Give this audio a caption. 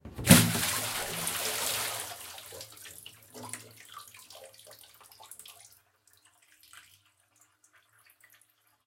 Water splash, emptying a bucket 4
I was emptying a bucket in a bathroom. Take 4.
bath, bucket, drops, hit, splash, water